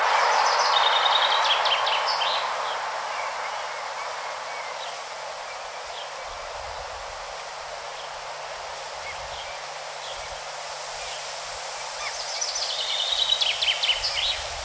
The song of a Chaffinch. This has been filtered to remove some wind and traffic noise. Recorded with a Zoom H2.
bird, birds, chaffinch, field-recording, forest, morning, norway, songbird, wind